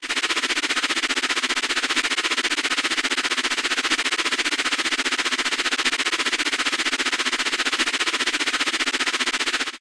Worst Sound in the World Contest, E
A horrible sound for a contest, created by recording an old photo-slide scanner, paulstretching the sound six times, layering it with adjacent semitone pitches, and finally adding a very deep tremolo. An awful sound.
The sound was recorded using a "H1 Zoom V2 recorder".
Originally edited using Audacity and Paulstretch on 25th September 2016.
dontlistentothissound, scanner, earbleed, annoying, sound, terrible, worst, horrible